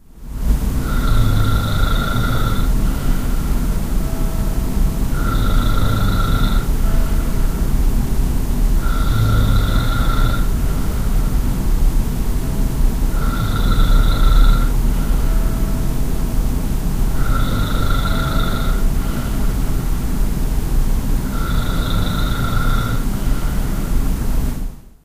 Far away you hear the churchbell of "de Westerkerk" (the geotag) in Amsterdam peal 6:00 am. while I'm asleep close to my Edirol-R09 recording it. The other thing you hear is the urban noise at night and the waterpumps in the pumping station next to my house, that keep the pressure on the waterpipes.